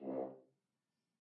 One-shot from Versilian Studios Chamber Orchestra 2: Community Edition sampling project.
Instrument family: Brass
Instrument: F Horn
Articulation: staccato
Note: C2
Midi note: 36
Midi velocity (center): 31
Microphone: 2x Rode NT1-A spaced pair, 1 AT Pro 37 overhead, 1 sE2200aII close
Performer: M. Oprean
brass, vsco-2, c2, single-note, staccato, multisample, f-horn, midi-velocity-31, midi-note-36